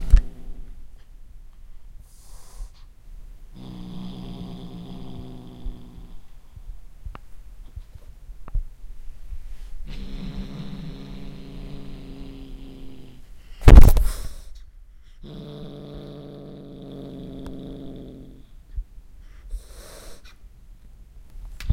Kitten Hiss and Growl
My kitten growling, hissing and clawing the microphone as I try to remove her catnip toy from her jaws.
cat, growl, hiss, Kitten